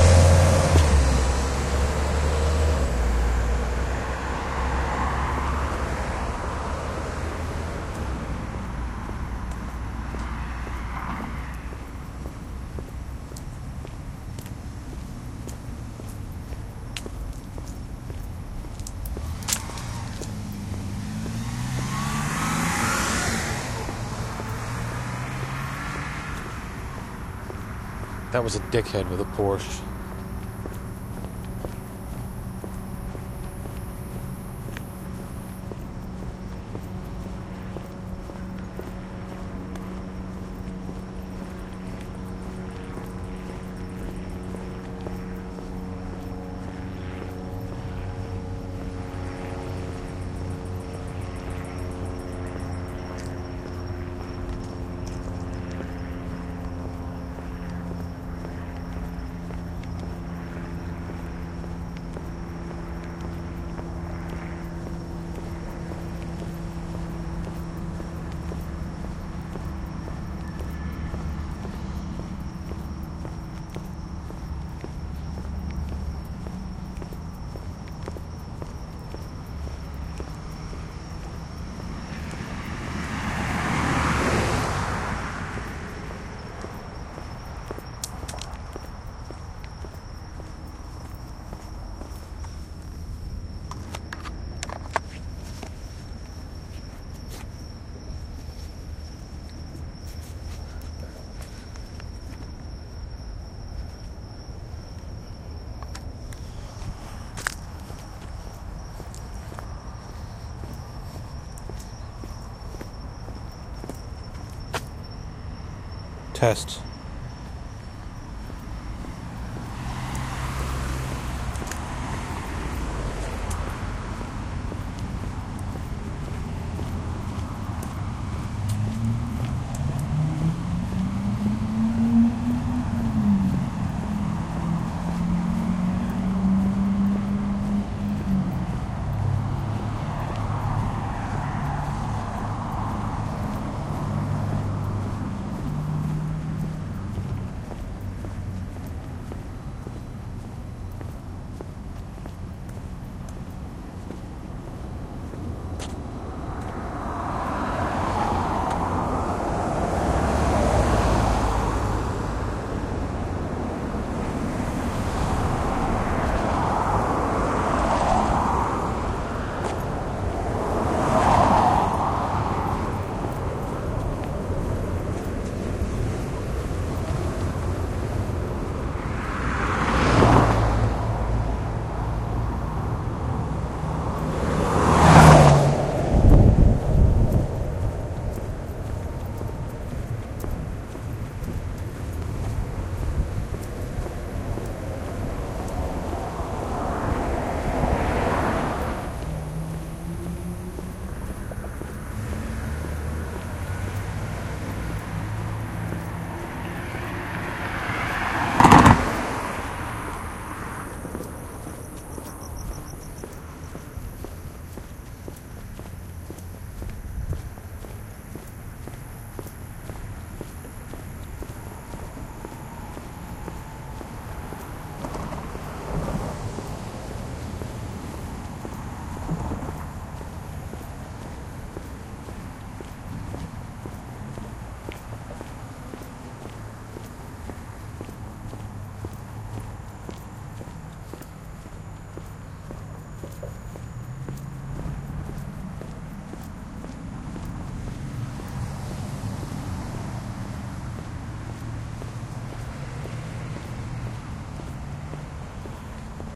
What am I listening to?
Recorded during a 12 hour work day. Getting off the bus again... at night this time.
transportation, public, field-recording, bus